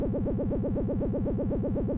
SCIAlrm 8 bit ufo
8-bit similar sounds generated on Pro Tools from a sawtooth wave signal modulated with some plug-ins
scifi, alarm, spaceship, alert